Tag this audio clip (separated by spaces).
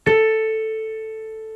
A La Piano